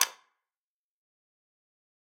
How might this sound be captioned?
Button plastic 3/4
A Click of an old casetterecorder.
Could be use as an sound for a menu or just sounddesign.
Hit me up for individual soundesign for movies or games.
horror, Click, Casette, trash, menu, sounddesign, home, Press, foley, Machine, game, Tape, Button, effect, plastic, video